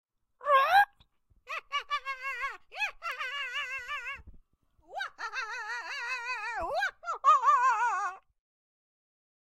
Grito Espantapajaros Animacion
Animacion, Grito, Espantapajaros